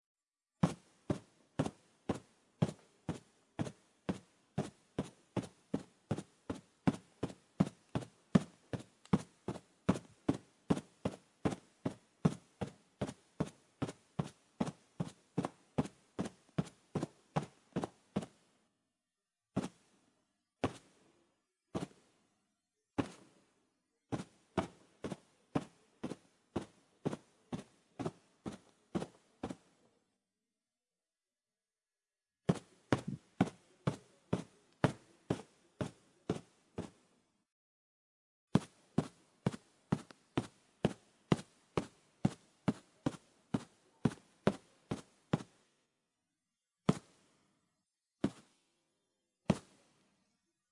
This sound might be useful for an march or tramp sound.
agaxly, march, tramp, walk